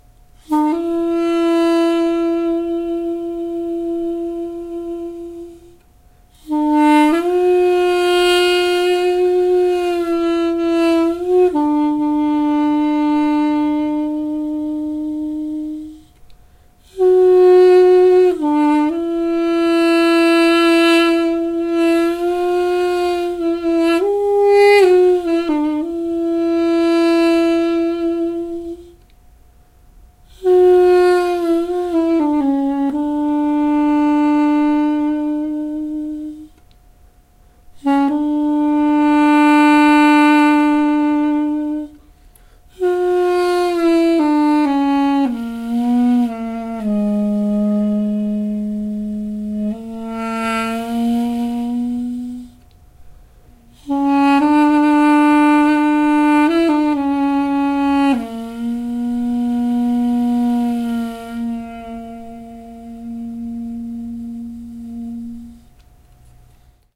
Armenian theme by Duduk - Armenian double-reed wind instrument
Recorder: Zoom H4n Sp Digital Handy Recorder
Studio NICS - UNICAMP